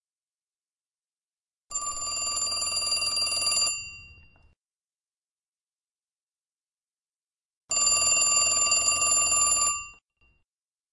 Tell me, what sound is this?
An old fashioned, touch-tone, large receiver telephone with a mechanical bell ringer. The bell has an arm attached to it that vibrates when a call is made. The vibrating arm against the bell is what causes that "old fashioned" telephone sound. Not a circular dial phone.